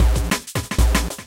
Just a Misc Beat for anything you feel like using it for, please check out my "Misc Beat Pack" for more beats.